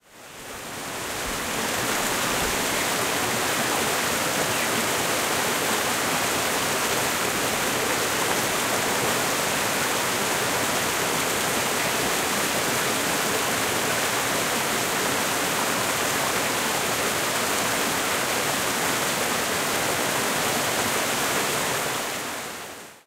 Water Flowing Through Close Rapids 6
Close field recording of water flowing through some rapids in a creek.
Recorded at Springbrook National Park, Queensland using the Zoom H6 Mid-side module.
close, flow